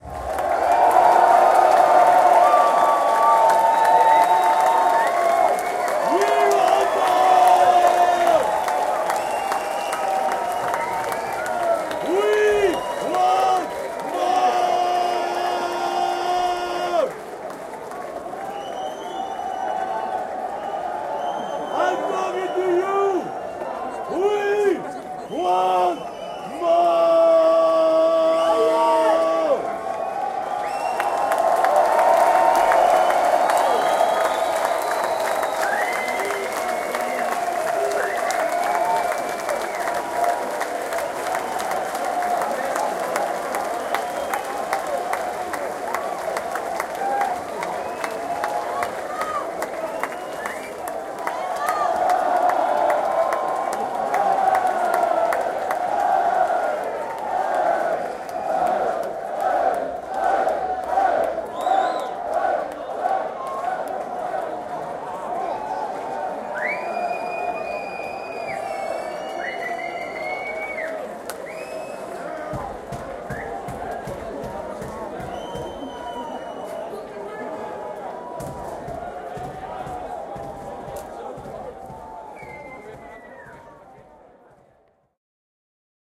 crowd applause we want more
This file is a recording with an iPod nano, with the Belkin voice recorder, November 2007.
It's an audience of about 1500 people applauding after a metal concert in a venue (013, which is the best venue for metal and hard rock concerts) in The Netherlands.
One drunk guy (standing somewhere behind me) shouts 'WE WANT MORE', and more, which is quite funny : )!